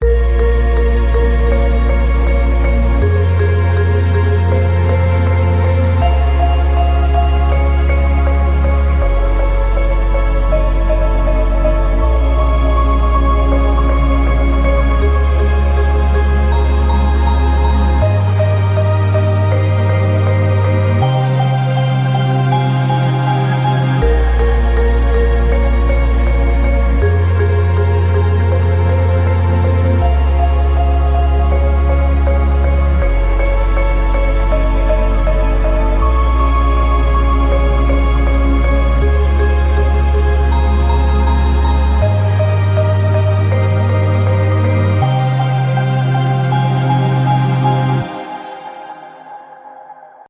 string, pad, and mallet progression